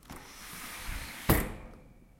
A house window closing.
closing, house, window